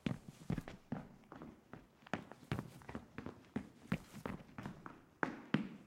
Human Runs in Boots
A male (or female) that is being on the run because the cops think they said Justin Bieber, but they said Just in beaver. Thank you for your sound jcdecha.
boots, boy, concrete, female, girl, male, man, run, running, runs, tile, woman